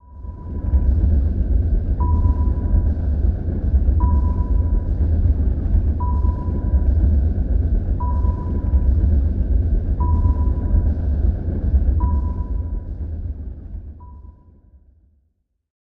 water>submarine
water: submarine : i staked different natural water noises(9 to be exact) at different pitch with various effects to obtain the envelope of this sound fx (one of this sound you can heard make me think about the water against the metal of the submarine),after, i took an fx witch look like a sonar from a plug-in synth witch i passed in a delay to give it deepness. then i added an natural airplane engine noise that i slowed down and filtered to delete the wind noise for feigned the submarine engine.
it was all mixed and processed in ableton live with a little finalisation with peak and a limiter.